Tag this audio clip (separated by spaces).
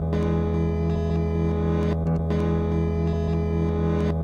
MPC Guitar echomachine